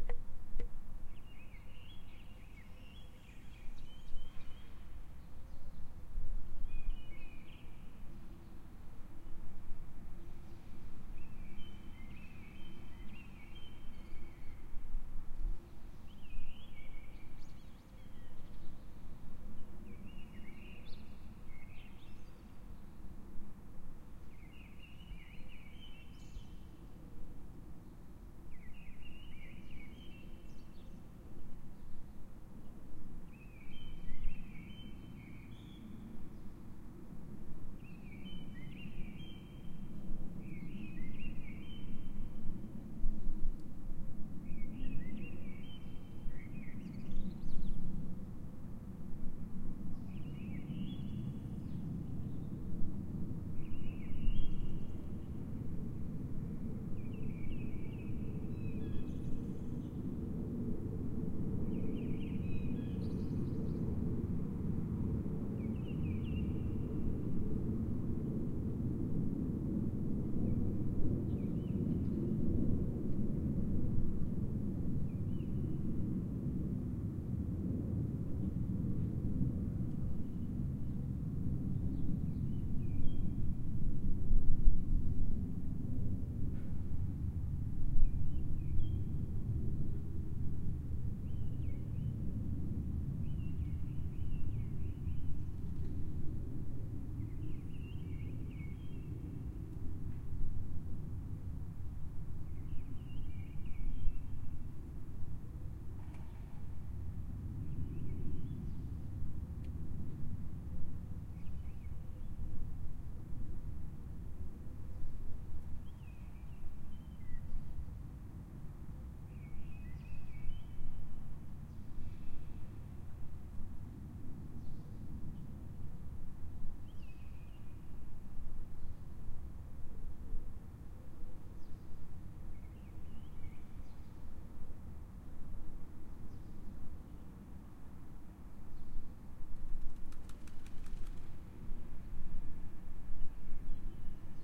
fez birds nature car
Bird song in Fez, Morocco
bird, birds, birdsong, field-recording, morocco, nature